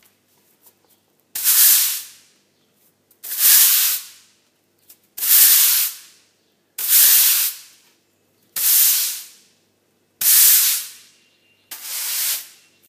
Broom sweep in a garage